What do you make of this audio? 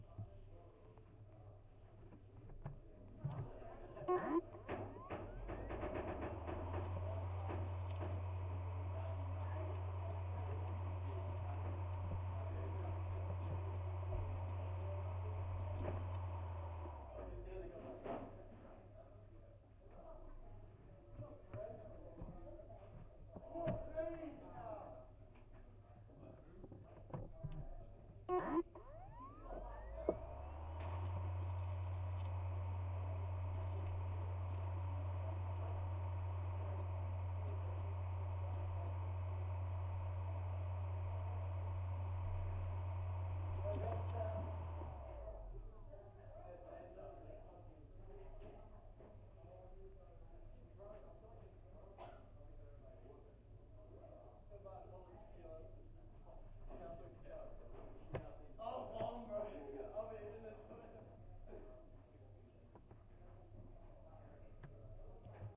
ExternalHDD PowerCycling hint of 1stYears
First attempt at recording a external hard drive power. Didn't realize how sensitive my homemade contact mics would be as they picked up other students from across the studio.
contact-mic, eletronics, field-recording